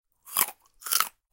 Chewing, Carrot, A
Raw audio of chewing on a carrot twice with a fairly good crunch. The recorder was about 15cm away from the mouth.
An example of how you might credit is by putting this in the description/credits:
The sound was recorded using a "H6 (Mid-Side Capsule) Zoom recorder" on 25th November 2017.
eat, crunching, bite, carrot, eating, chew, vegetable, biting, crunch, chewing